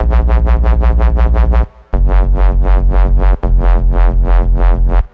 Wobble Bass Test
wobbly, wobble, bass, bassline, lfo, wobbling